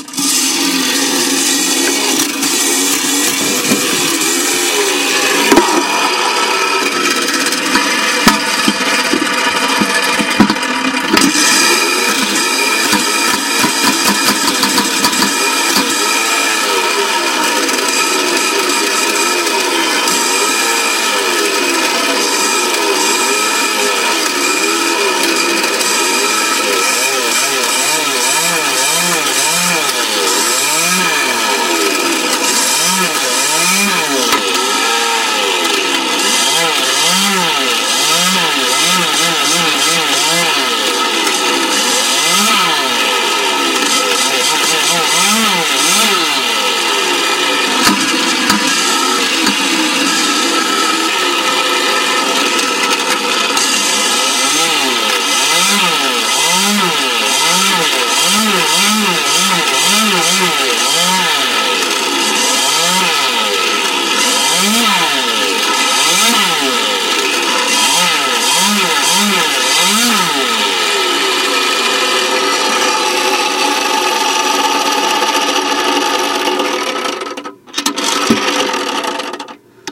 A kid's safe. Opening and closing it's door sounds like a loud chainsaw.
safe, chainsaw, noise